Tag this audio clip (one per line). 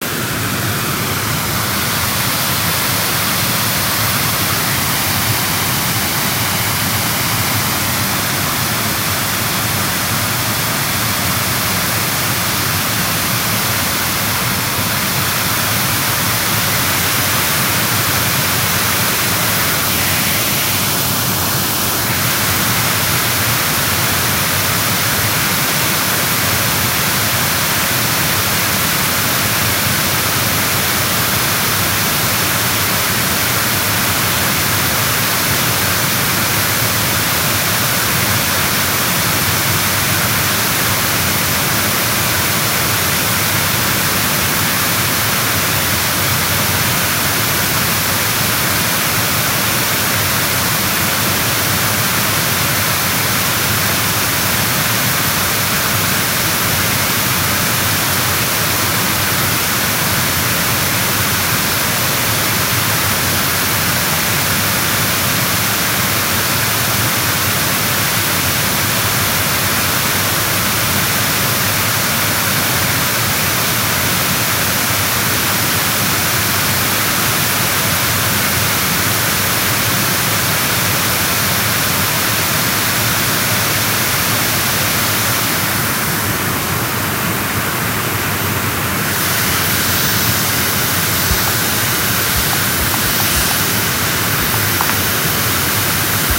ambient
England
field-recording
Frome
natural-soundscape
nature
river
Somerset
UK
water
weir